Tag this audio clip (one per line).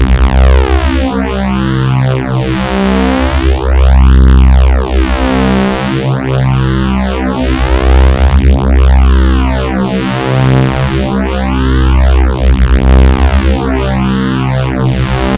detune
reese
saw